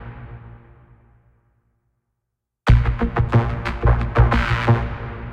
Effect Drum
beat, drum, effects